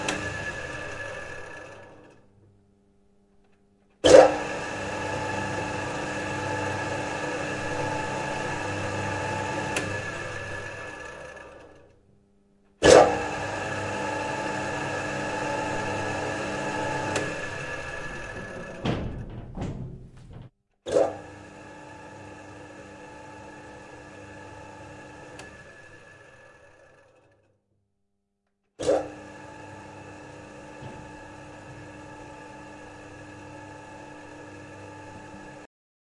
Industrial motor on of
Turning on the electric motor of a spring hammer used in a smithy. Recorded using the Røde ntg3 mic and the onboard mics of the Roland r26 recorder.
industrial, R, spring, de, r26, hammer, Roland, ntg3